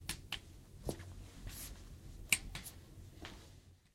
GARTION Alexis 2013 2014 son5
Sound : recording the sound of a man walking with crutches with a dynamic microphone
Stéréo
16 bits
Duration : 4 seconds
//EFFECT
Egalisation
Fade Out
Réduction de bruit (sensibilité : 8db)
Amplification (5db)\\
Typologie : Itération variée
Morphologie
masse : Groupe nodal complexe
timbre harmonique : Brillant
grain : lisse
allure : sans vribato / sans chevrotement
dynamique : attaque abrupte
profil mélodique : variation scalaire